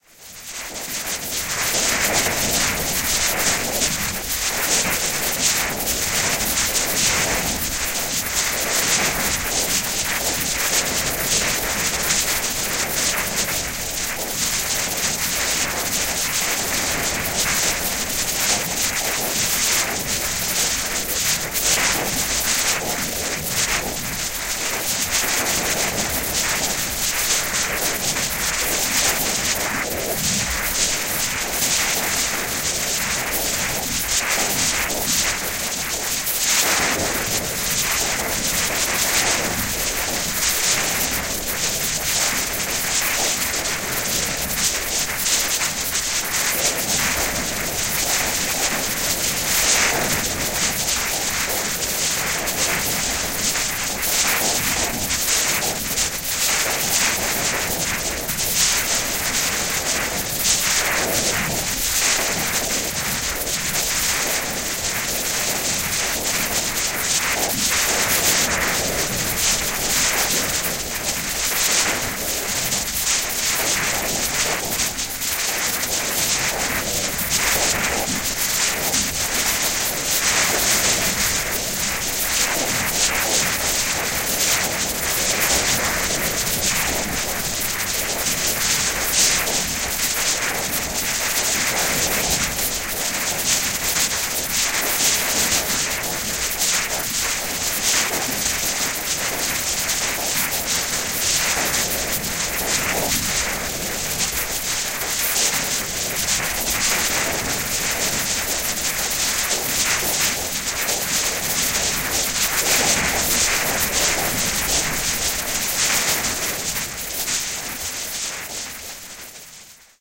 Noise Garden 19
1.This sample is part of the "Noise Garden" sample pack. 2 minutes of pure ambient droning noisescape. Heavy rainy and windy noise.
drone, effect, soundscape, reaktor, electronic, noise